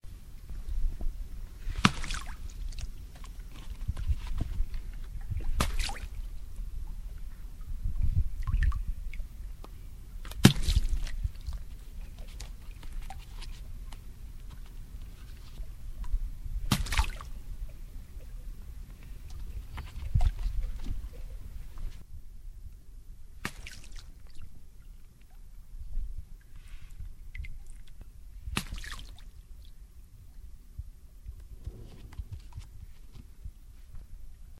Live recording of dunking a ball in water and the water dripping off when the ball is removed from the pool
dunk, water, ball, field-recording